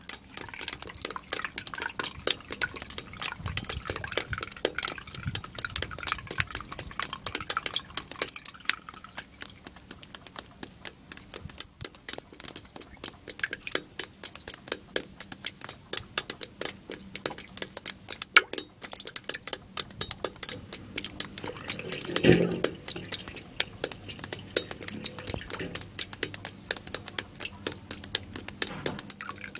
Drips on Steel Grate
Melting snow on my rooftop dripping into a steel grate.
drip, drop, field-recording, melt, ping, pling, snow, splash, water